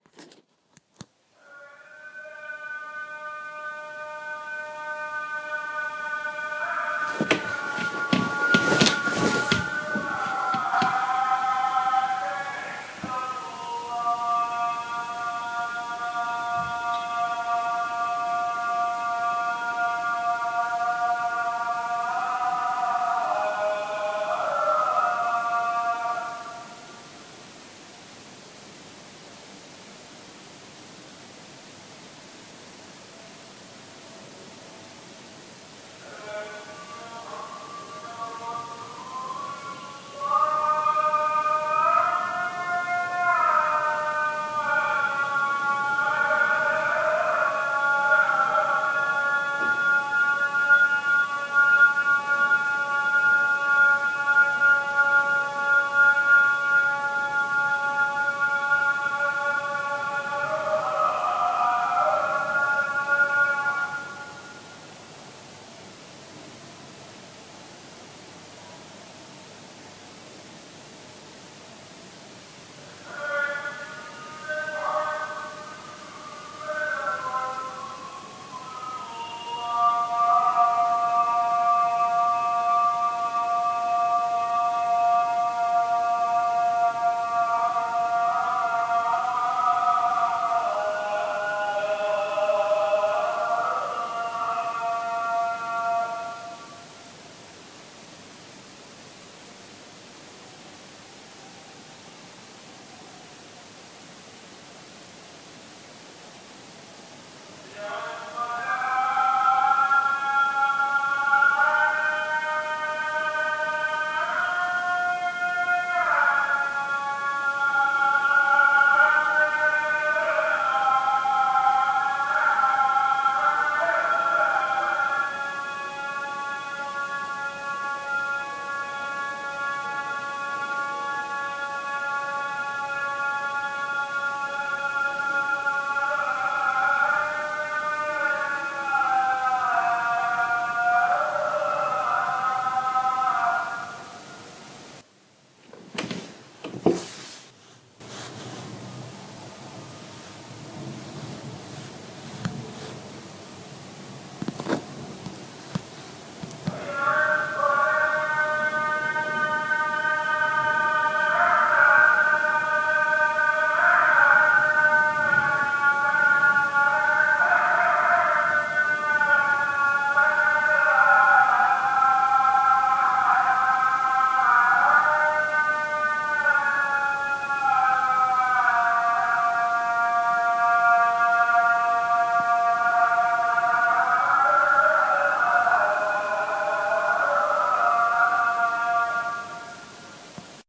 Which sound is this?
Low quality field recording of morning prayer heard while in my hotel room in Istanbul.